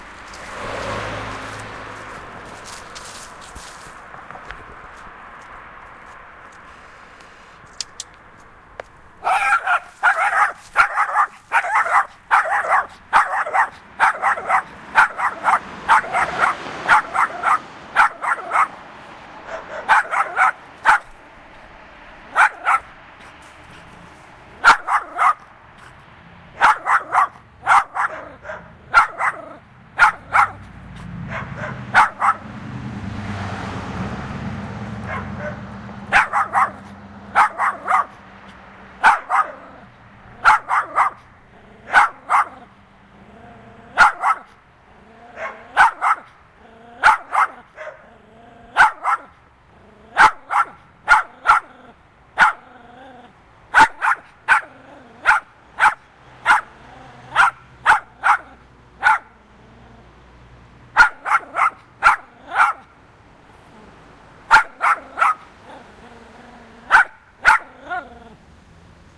Ben Shewmaker - Noisy Dog
Noisy dog that used to live nearby
animals, dog